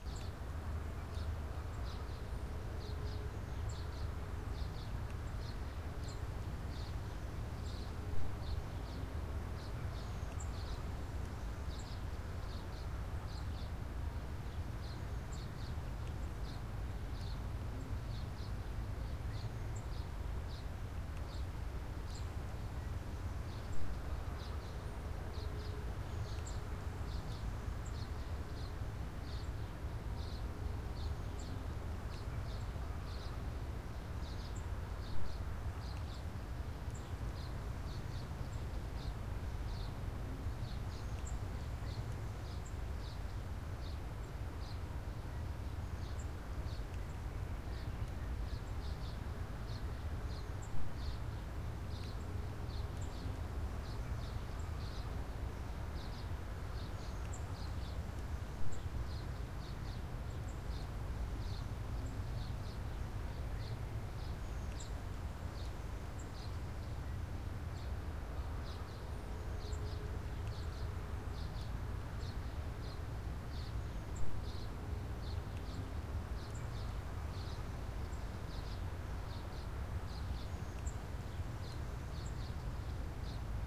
So much tone, where does it all come from Don't say it I already thought of it. It's a wet dream
Tone recorded in the San Fernando Valley. Has AC and traffic tone in BG as well as light birds.
Fernando, San, Valley, Tone, Light, City